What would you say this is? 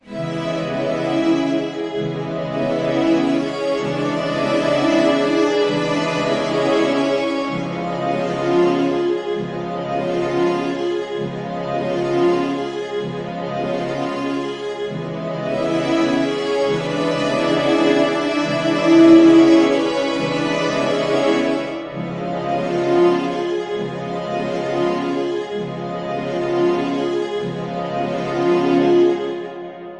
Two chords and a 3 note melody processed in Music Maker's Cinematic Synt. This version is a string ensemble voice, a bit lighter than the others but moody.
beautiful, C, cinematic, D-flat, dramatic, film, foreboding, moody, movie, ominous, orchestra, soundtrack, string-ensemble, strings, synth, synthesizer, tense